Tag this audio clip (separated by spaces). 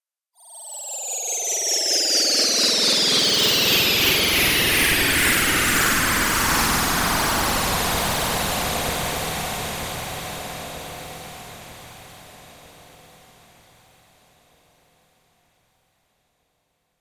sci-fi drive soundscape sound-design rumble future machine Room fx electronic dark futuristic energy effect spaceship deep bridge background hover space impulsion emergency pad noise engine ambient ambience drone starship atmosphere